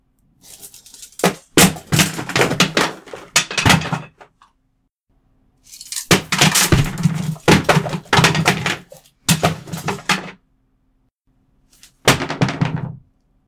drop little wood stuff
A bunch of wooden and plastic odds-and-ends being dropped, to make a crashing sounds.
drop, spill, wood, plastic, crash